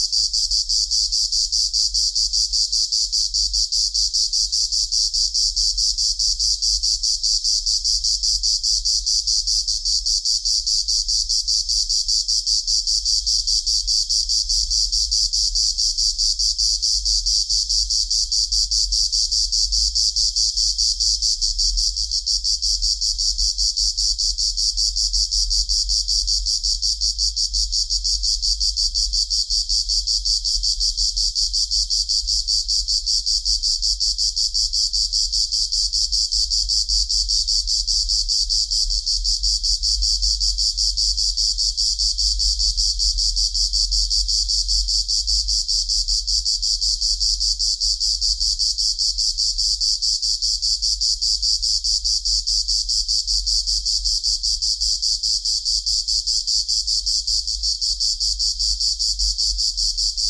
cicadas hi-pass filtered
Cicadas of south city at summer night recorded from a window. Hi-pass filtered of various car sounds, and so timbre is not so natural — but maybe it’s what you are looking for?
Recorded by Nokia 700, processed in Audition.